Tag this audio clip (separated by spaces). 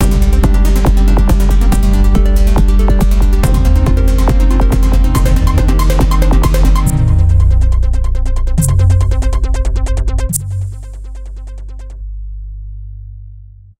You marvelous title mixed editing animation track can drum flash use intro acidpianosnarekick A